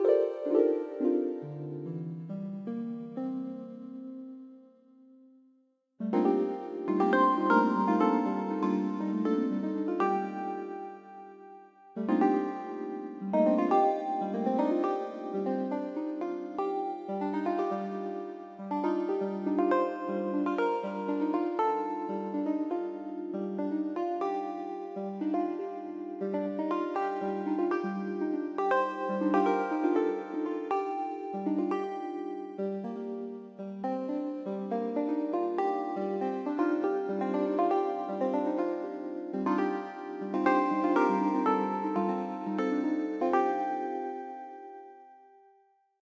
Elf Harp
A chill elf tabern song.
Recorded with my keyboard on LMMS.
harp, elfic, lounge, chill, myth, chilled, heaven, tabern, elf, mythological